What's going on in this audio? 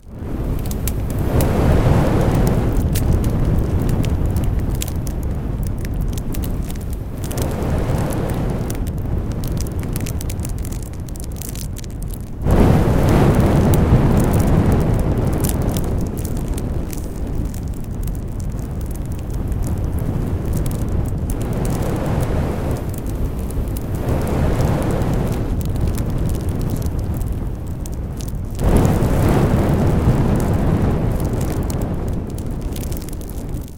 big fire1
fire, flame, flames, fireplace, burn, burning, horror